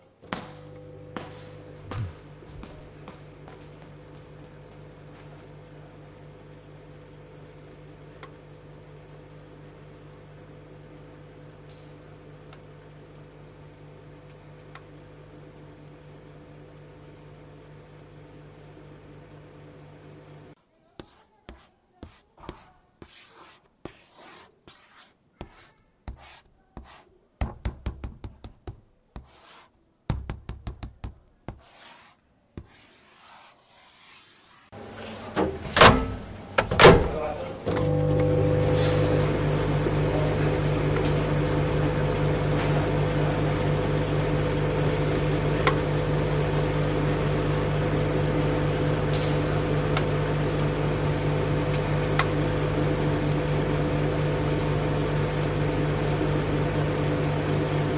tcr Mysounds CHFR Maxime-Evan
France, Soundscapes